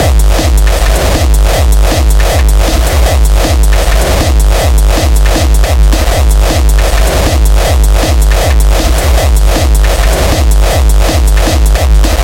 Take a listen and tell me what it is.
SNS SLOOPKICK
A RAW INDUSTRIAL LIKE HARDCORE KICK (smaller Sound) by Skeve Nelis
Made by SNS aka Skeve Nelis